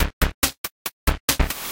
Analog Beats 3
short analog drum loop made with white noise
140bpm
hat, 140, kick, drums, noise, bass, bpm, snare, beat, break, drum, loop, analog, hi